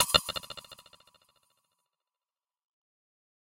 Metal Drops 2
Tweaked percussion and cymbal sounds combined with synths and effects.
Abstract, Dripping, Drops, Metal, Metallic, Percussion, Sound-Effect